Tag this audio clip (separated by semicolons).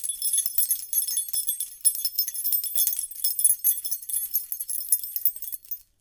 0; vol; key; shaking; chimes; natural; egoless; sounds